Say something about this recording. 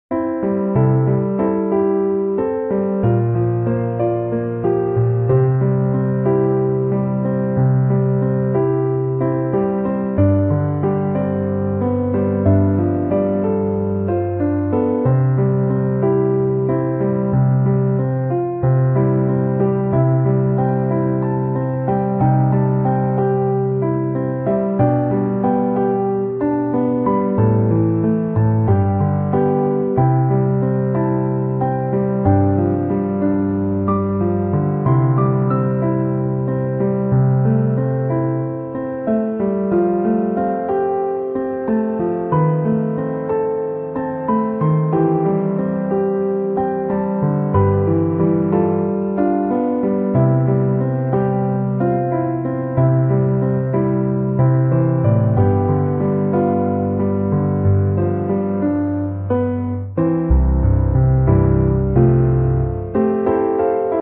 Piano country music
cinematic, music, atmosphere, ambience, country, dark, Piano